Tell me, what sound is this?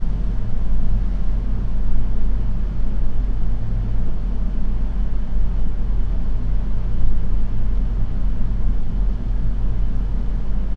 cargo hold ventilation
Keeping the cargo chilly.
ambience, ambient, atmosphere, claustrophobic, cold, cosmic, drone, field-recording, hyperdrive, hyperspace, industrial, interior, interstellar, lounge, relaxing, sci-fi, soundscape, space, spaceship, ventilation, vessel